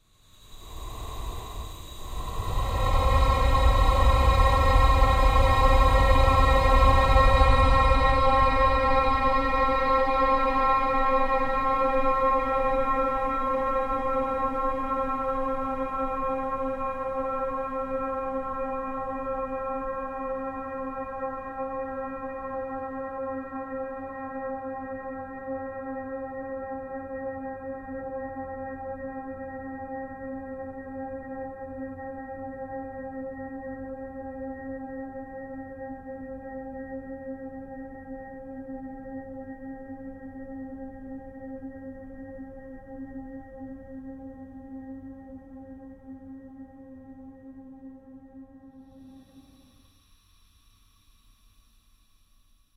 ambience 02 darkgrey
Sound 2/4, the second lowest note - thus dark grey, of my epic ambience pack.
Created in Audacity by recording 4 strings of a violine, slowing down tempo, boosting bass frequencies with an equalizer and finally paulstretch. Silence has been truncated and endings are faded.
ambient, soundscape, space, atmos, drone, deaf, ambiance, tension, thrill, dramatic, atmosphere, numb, ambience, suspense